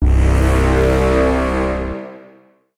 Just a little inception horn sound that I created by as a demo for my friend.
horn, heavy, strong, inception, clear